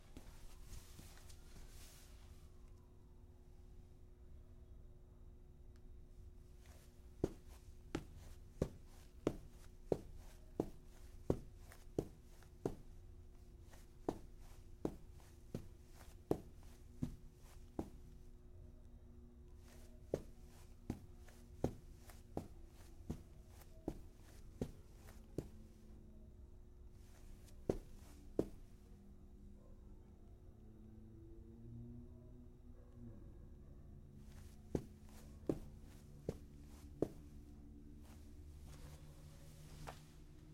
PASOS DENTRO
pasos encima de alfombra
Step on carpet, inside a room, was recorder with a rode nt2
CARPET, CUARTO, ON, INSIDE, DENTRO, PASOS, STEPS, ALFOMBRA